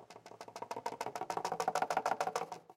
Recordings of different percussive sounds from abandoned small wave power plant. Tascam DR-100.